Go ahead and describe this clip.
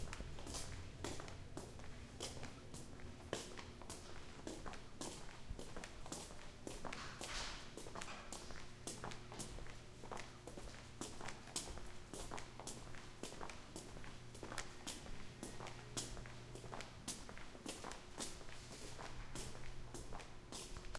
Walking down the hallway
Someone walking down a hallway with boots on, very open area.
ground, steps, footsteps, stone